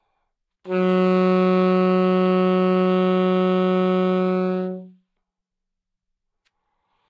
Sax Alto - F#3
Part of the Good-sounds dataset of monophonic instrumental sounds.
instrument::sax_alto
note::F#
octave::3
midi note::42
good-sounds-id::4646
Fsharp3, alto, good-sounds, multisample, neumann-U87, sax, single-note